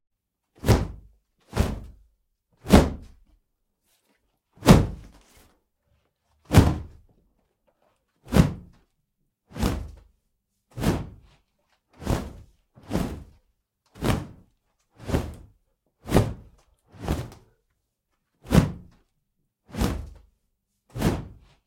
Powerfull Whooshes 2
Processed whoosh recordings for your motion graphic, fight scenes... or when you just need a little whoosh to you sound design :) Add reverb if needed and it's ready to go.
If you use them you can send me a link.